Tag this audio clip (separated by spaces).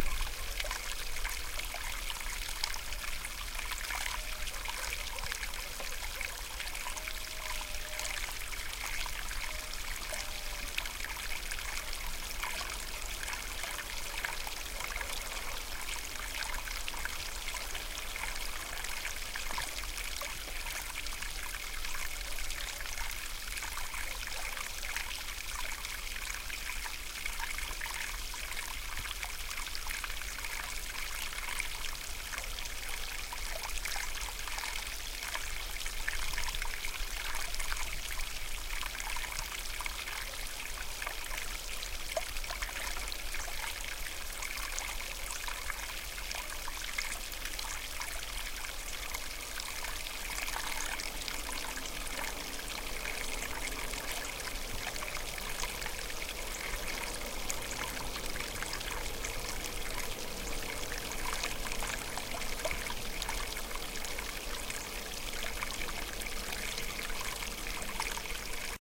ambiance ambience ambient background barking distant dog field-recording nature soundscape stream water